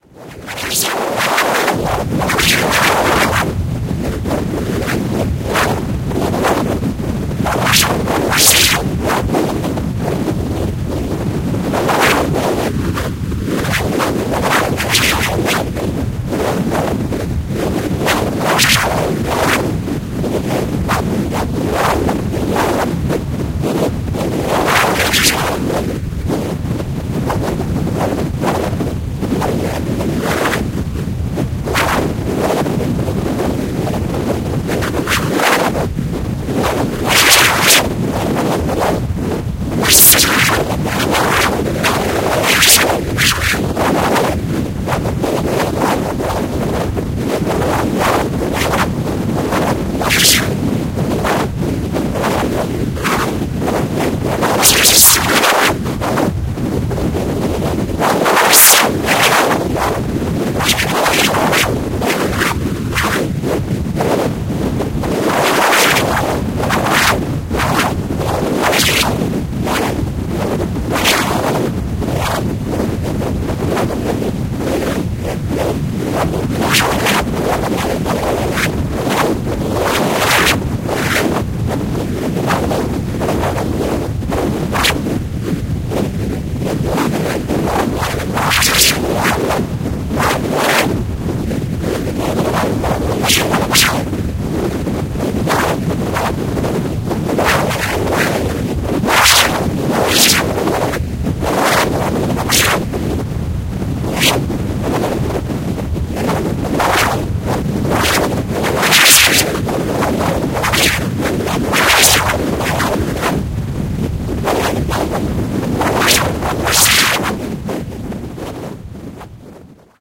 This sample is part of the “Wind” sample pack. Created using Reaktor from Native Instruments. High frequencies and quite speedy and short whipping sounds.
ambient, drone, reaktor, soundscape, wind